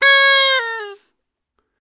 Party horn
The little horn that is used in new year party